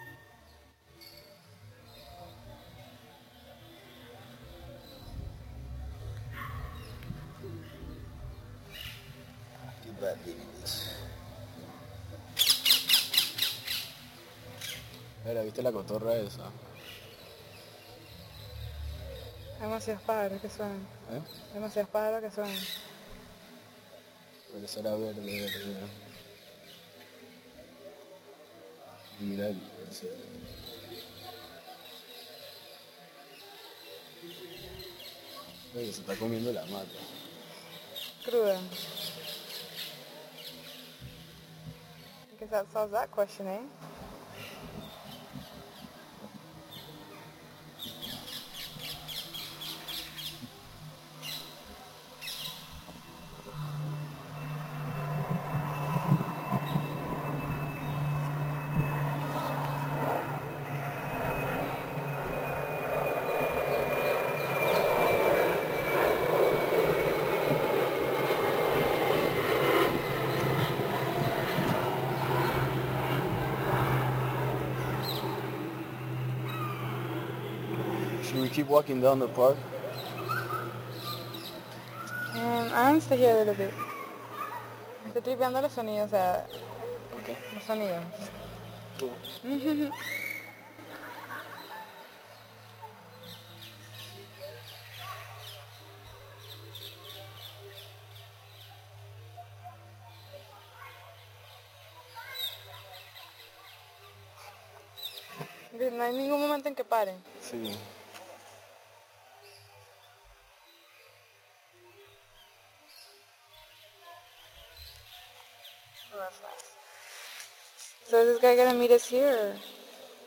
bird-park
From summer 2008 trip around Europe, recorded with my Creative mp3 player.Non stop bird sounds from botanical gardens in Amsterdam
bird, natural, park, relax